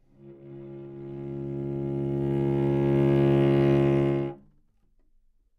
Cello - C#2 - bad-dynamics
Part of the Good-sounds dataset of monophonic instrumental sounds.
instrument::cello
note::C#
octave::2
midi note::25
good-sounds-id::4368
Intentionally played as an example of bad-dynamics
cello, Csharp2, good-sounds, multisample, neumann-U87, single-note